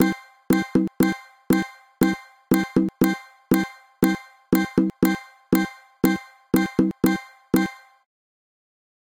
sonido agudo de base